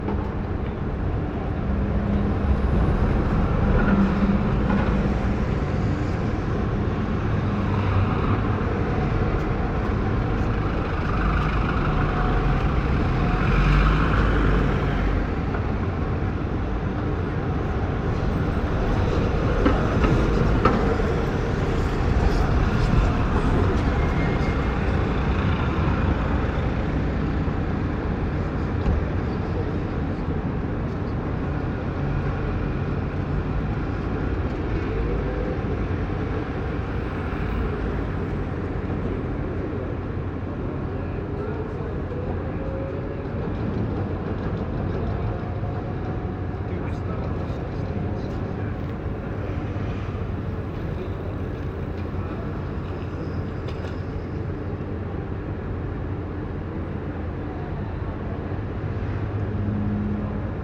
Ambience sound at the London Stone
london, stone, ambiance, field-recording, urban, city, lore